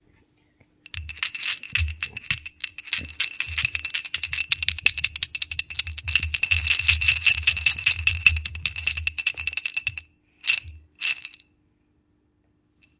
06 Baby Toy
This is a recording of a baby toy. It was recorded at home using a Studio Projects C1.
baby, toy